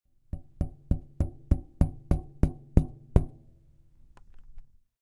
Metal Object Tapped
Tapping on metallic object. Recorded in stereo with Zoom H4 and Rode NT4.